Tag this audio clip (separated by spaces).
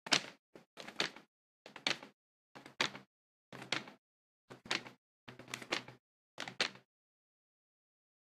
wings flying fly